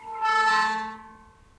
Gate squeak 1
fx, squeak, metal, hinges, open, squeaky, metallic, creak, squeaking, gate, door, hinge, close, foley, field-recording